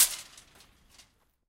Throwing a wooden skirt on floor
I threw a wooden floor skirt on the floor.
Thought I'd share that with you...